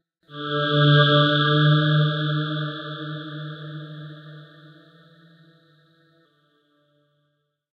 This is a sound I synthesized using ZynAddSubFX. I had to keep it around after I accidentally created it while playing around. It sounded a lot like this noise in the game series known as "Myst" specifically it reminds me of the sounds in "Riven" (the sequel to Myst) when you travel through books. If you played the game that will all make sense and if not and you are really bored and like puzzles those are amazing games :-)
This sound is part of the filmmakers archive by Dane S Casperson
A rich collection of sound FX and Music for filmmakers by a filmmaker
Tech Specs
HTZ: 44.1
Source: Synthesized (in ZynAddSubFX)